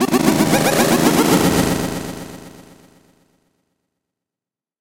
Retro Game Sounds SFX 129
shoot, sounddesign, soundeffect, freaky, sound, sfx, retrogame, weapon, sci-fi, fx, effect, gameover, electric, pickup, gun